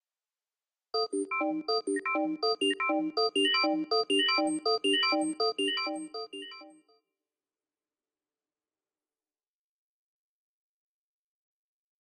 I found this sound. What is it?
beeps in rhythm made with a computer. recorded and edited with logic synth plug ins.